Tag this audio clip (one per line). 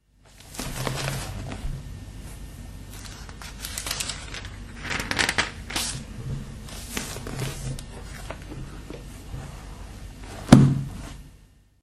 paper
turning-pages